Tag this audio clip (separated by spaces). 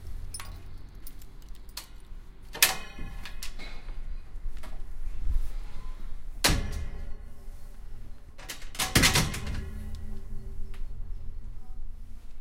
close foley opening open Door ambient metal ambience closing